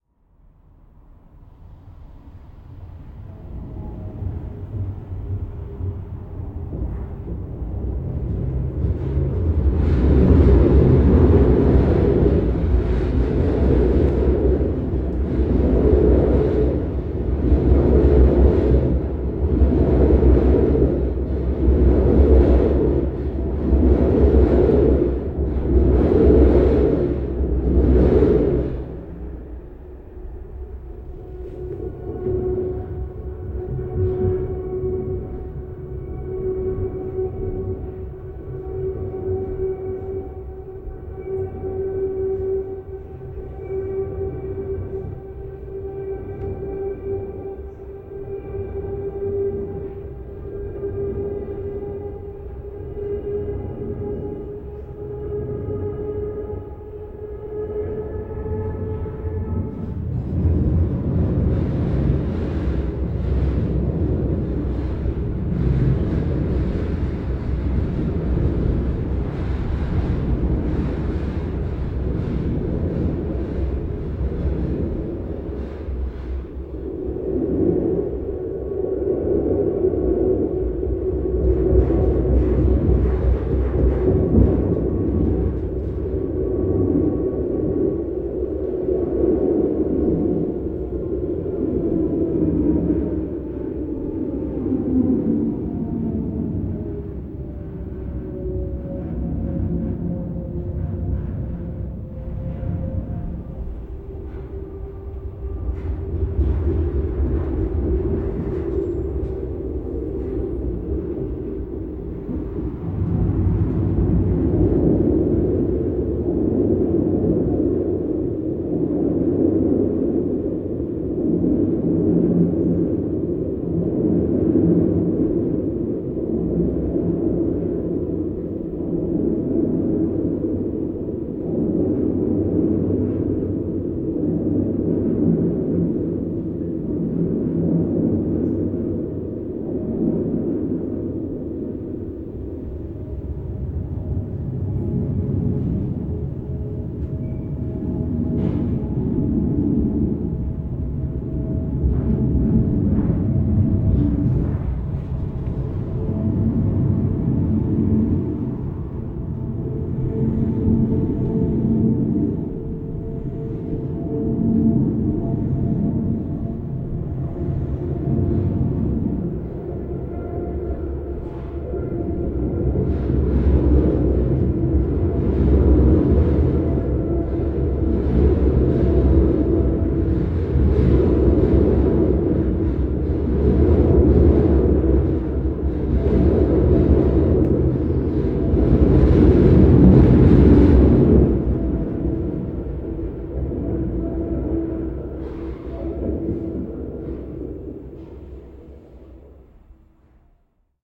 train collection - recyclart, brussels
Sounds of trains passing above an art atelier in Brussels, Belgium; the room is right below the railway, so you can hear trains making different kinds of rumbles and noises from the other side of the ceiling.
It was recorded with a Tascam HD-P2 recorder and a Sennheiser MKH50 directional microphone.
I selected only the train-passing moments, there is a metal door shaking on the background and maybe some traffic, but I think it's cool enough.